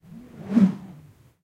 A stereo field-recording of a swoosh sound created by swinging a 3.5m length of braided climbing rope. Rode NT-4 > FEL battery pre-amp > Zoom H2 line-in.
dry, stereo, swoosh, whoosh, xy